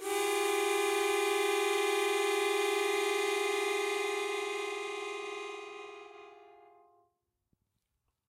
Chromatic Harmonica 10
A chromatic harmonica recorded in mono with my AKG C214 on my stairs.